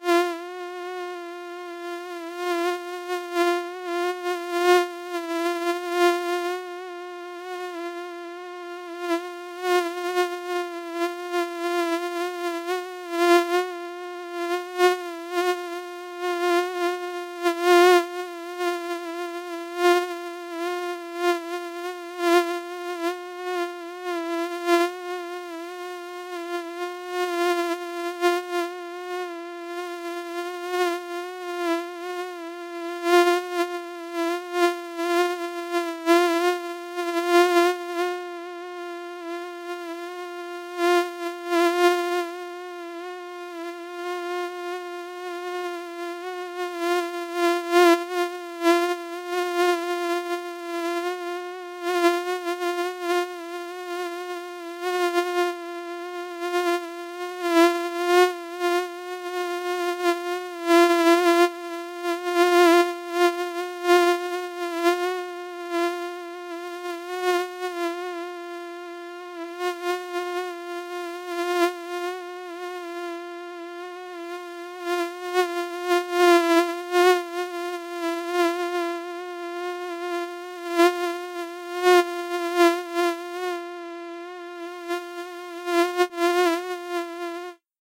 A quick simulation of a mosquito flying around I did in puredata (I couldn't find a suitable recording so tried making it )
Link to the pd patch:
bug, buzzing, fly, insect, mosquito, puredata, sound-fx, synth